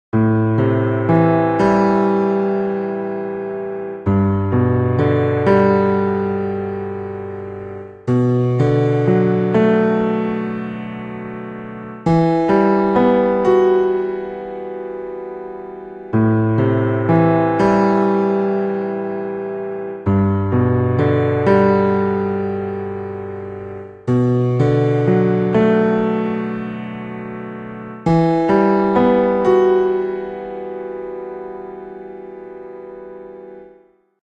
Grand Piano Thing
Just something I threw together in the early morning. The sound has a dark vibe to it, which is helped by the minor chords.
Keys, Piano